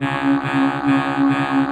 generated using a speech synthesis program-- layering several vowel sounds. applied mid-EQ boost, reverb and phaser.